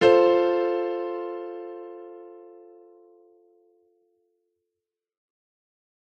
F Major piano chord recorded with a Yamaha YPG-235.